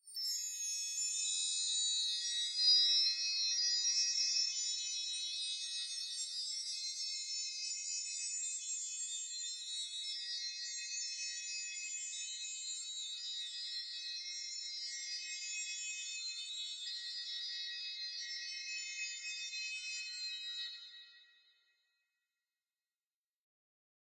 Shimmering Object 3
Third glittery object noise. Generated in Gladiator VST
magic, sparkle, chimes, fairy, ethereal, glitter